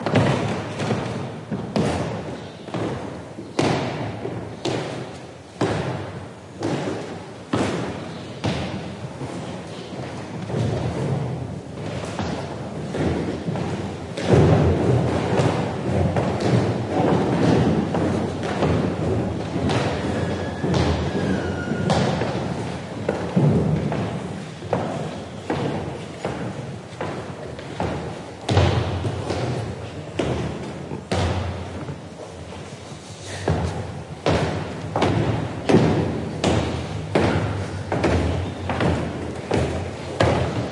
going down old wooden stairs. Shure WL183 and Olympus LS10 recorder. Recorded at Casa de los Tiros, Granada, Spain